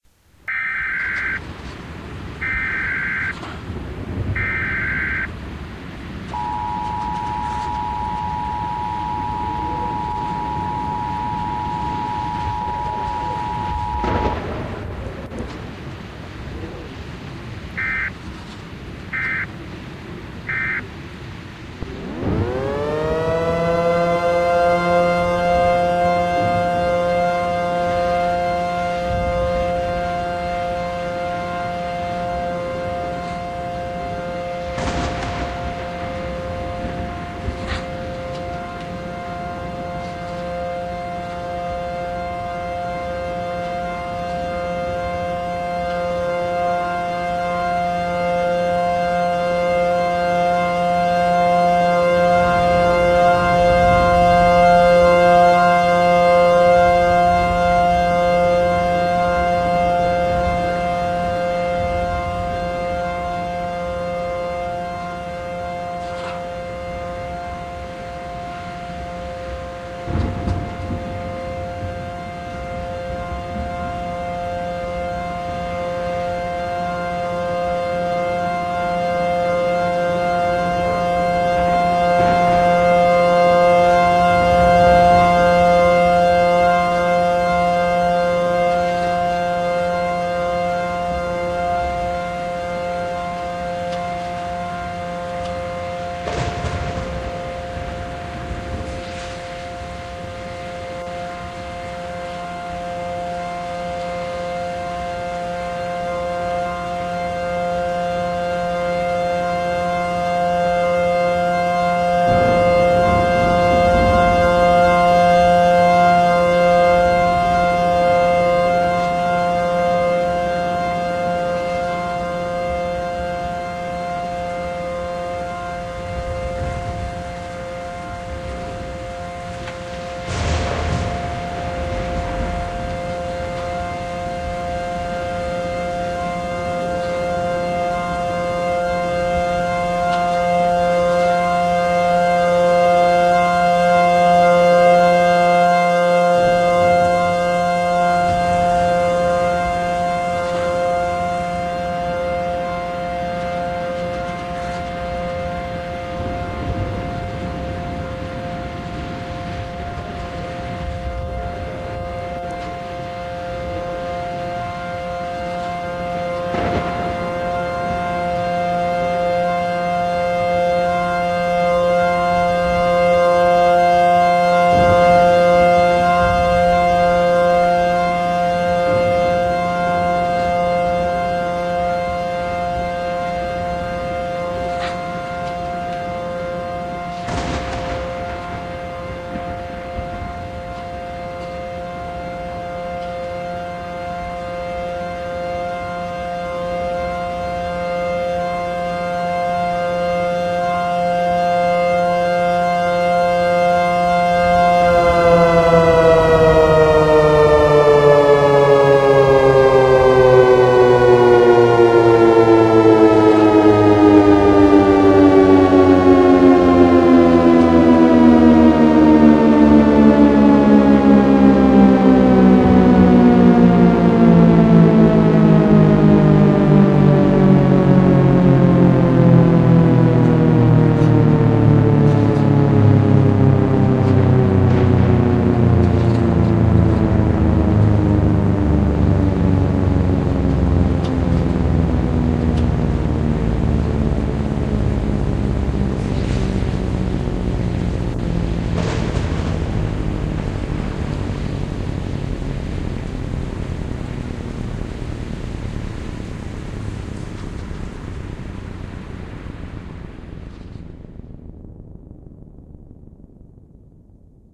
Allertor Siren during Storm
This is my First Synth with a storm in the background! The 9/12 Port Siren is an ACA Allertor with a Fast Wind-Up. I added a Raspy Triangle Wave for the Allertor / Model 2T Effect. Before the Triangle Wave it sounded like a Screamer / P-15. I also added Reverb to the Thunder!
-Siren Boy
-MSS & More Team